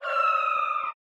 the synth likes cats
Made with an Arp Odyssey (synthesizer)
ambient, arp-odyssey, sounds